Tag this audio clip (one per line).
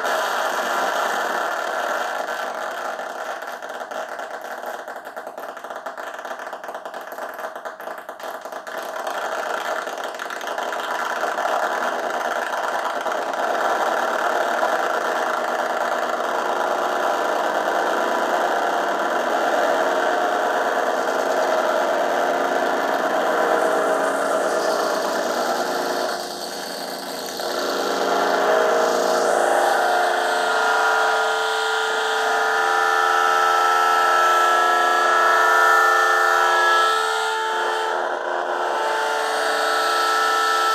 breakup
distortion
electric
electricity
feedback
guitar
miniamp
overdrive